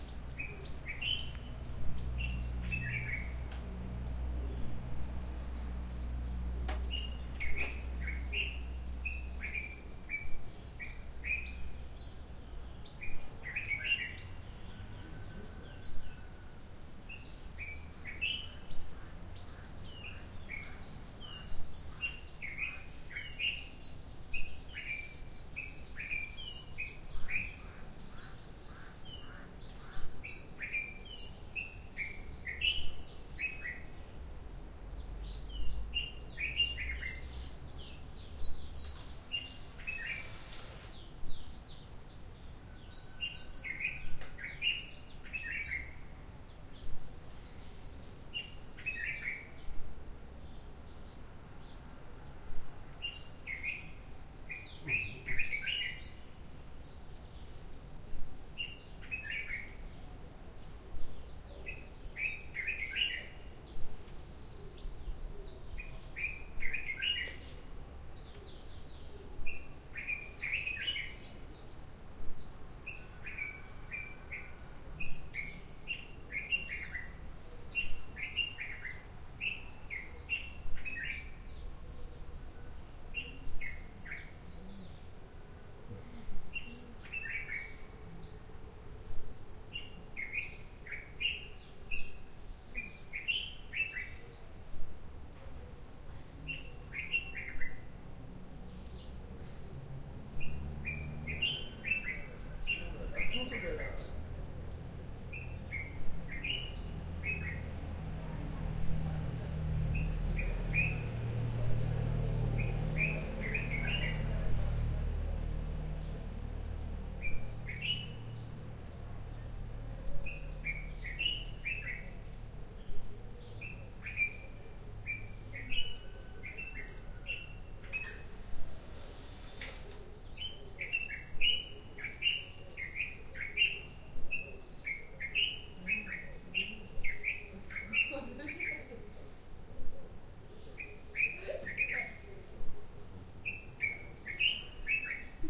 A bird at baro hotel
A bird in the morning in Adis Abeba, other around, one-off voices and cars. 2009.